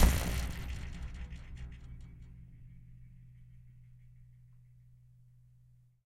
recordings of a home made instrument of David Bithells called Sun Ra, recordings by Ali Momeni. Instrument is made of metal springs extending from a large calabash shell; recordings made with a pair of earthworks mics, and a number K&K; contact microphones, mixed down to stereo. Dynamics are indicated by pp (soft) to ff (loud); name indicates action recorded.